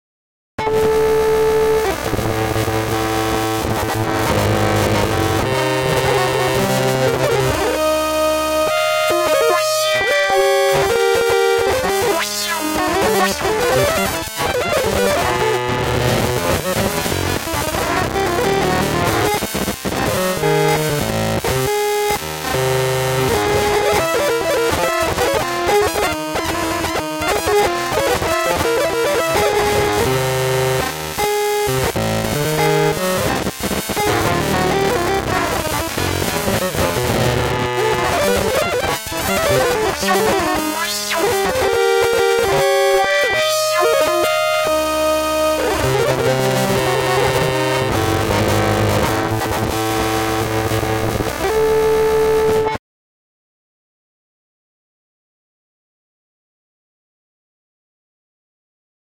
Z Drop Out: Retrograde Counterpoint
Emulation of a distorted electric guitar, in full glitch mode, generated in Zebra. One channel provides a retrograde counterpoint to the other.